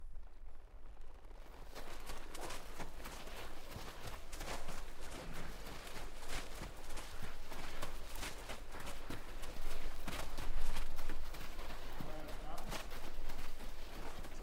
Horse Spinning In Dirt 01
A horse spins in place on dirt/sand.
Dirt, hooves, Horse